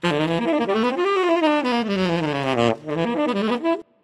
Saxophone 1 - Tenor (processed)
Konk Zooben fast tenor saxophone melody with post-processing.
jazz, saxophone, tenor-sax